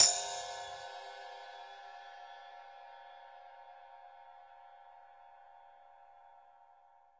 TamboRide Perc Tambourine Ride Cymbal Percussion - Nova Sound
Ride, EDM, Percussion, House, Clap, Drum, Cymbal, Loop, TamboRock, Sound, Dance, Minimal, Perc, Electro